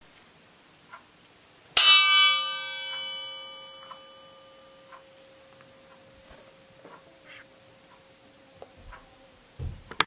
The only background sound is a rather noisy clock, which can be taken out. Recorded with a black Sony IC digital voice recorder.